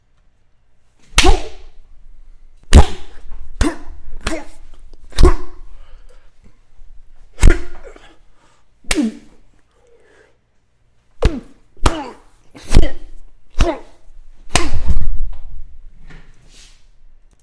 real punches and slaps
These are actual punches to myself. Recorded using Audacity and Apogee Duet with Rode mic. Enjoy!